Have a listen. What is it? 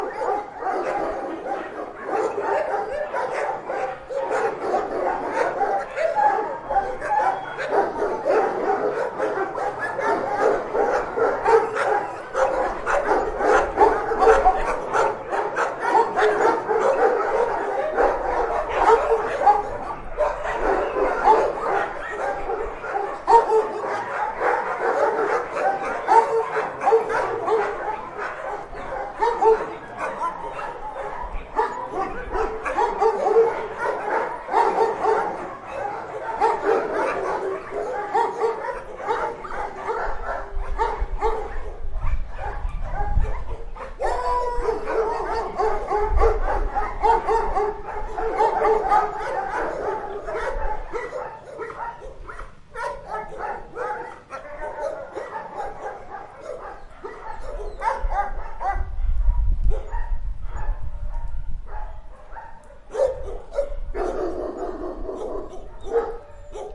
recorded in shelter for dogs